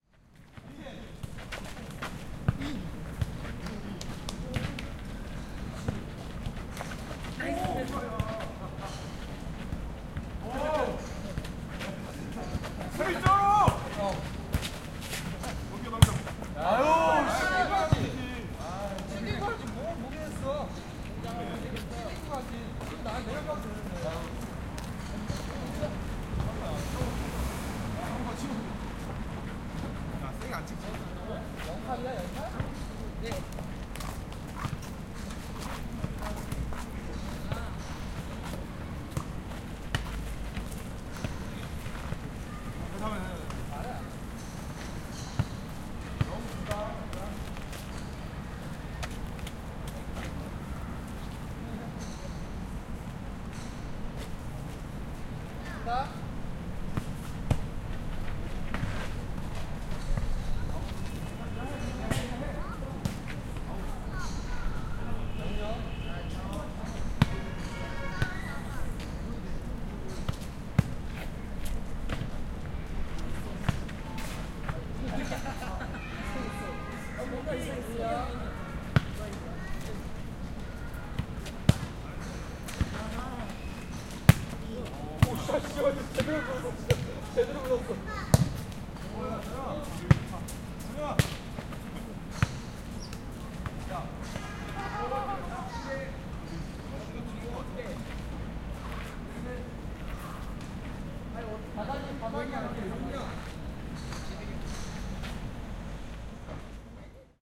0295 Mullae park football
People playing football and talking in Korean. Golf in the background.
20120616
field-recording, football, korea, korean, seoul